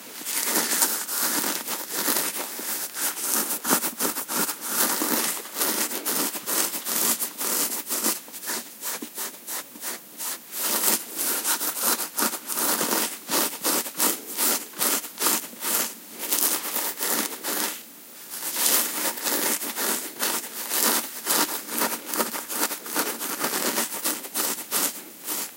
20090405.hairy.chest
close-up of the noise of my chest being scratched, stereo recording. Sennheiser MKH60 + MKH30 into Shure FP24 preamp, Edirol R09 recorder